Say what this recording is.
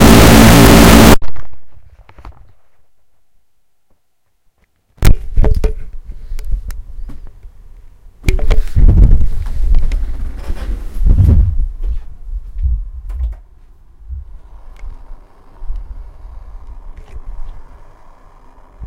trying to set-up my recorder to use as a mic but got a ton of feedback instead.